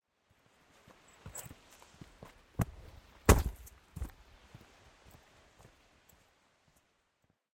Jumping Over Object While Hiking
Sound of jumping over a log while on a walking track.
Recorded at Springbrook National Park, Queensland using the Zoom H6 Mid-side module.
heavy, foot, footsteps, step, hiking, steps, footstep, ground, walking, walk, feet, landing, jumping